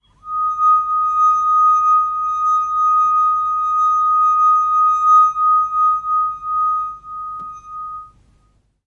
crystal wine glass resonance. D# very clean glass tone
crystal, tone